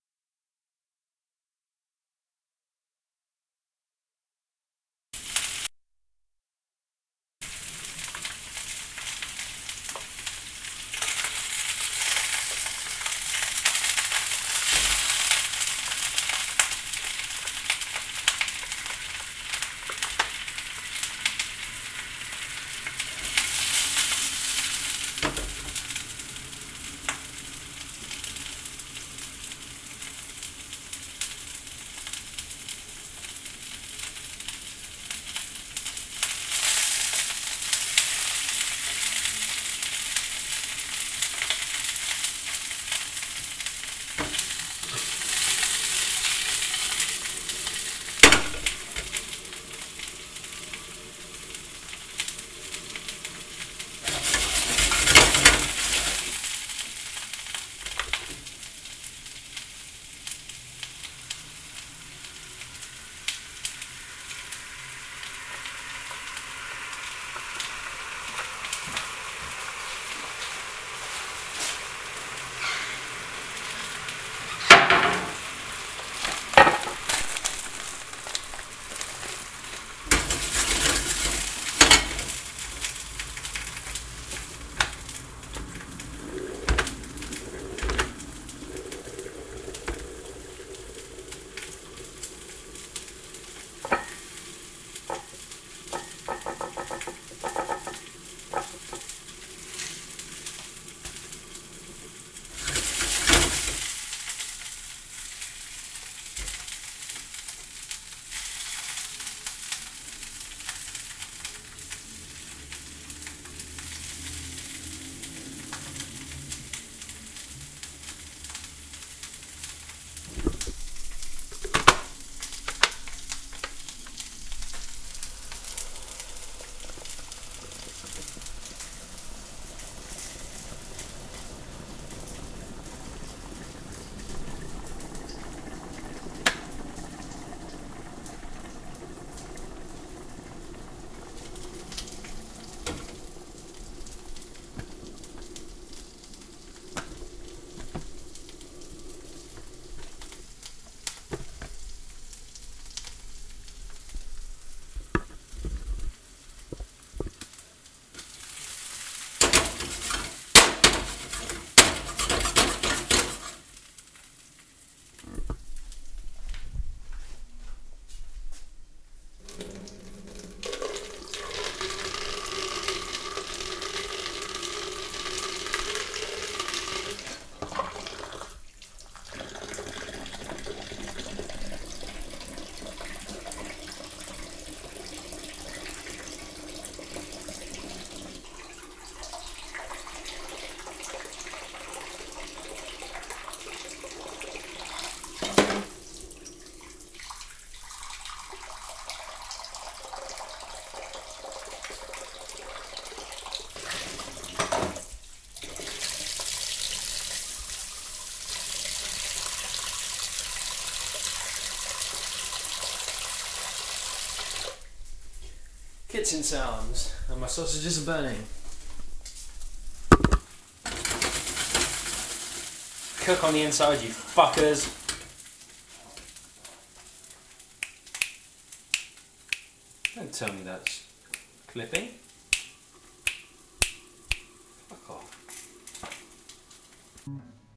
Join me in the kitchen for a few minutes as I boil up some water, run water in the sink and fry up some sausages which end up exhausting my patience. Mono.
minidisc, boiling, sausages, skillet, water, mz-r50, cooking, recording, kitchen, home